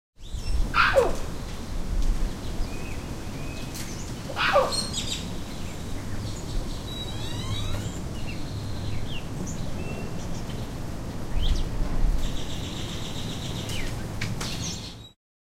Red-capped Mangabeys calling with grackles and catbirds in the background. Recorded with a Zoom H2.
catbird, field-recording, grackle, mangabey, monkey, primates, zoo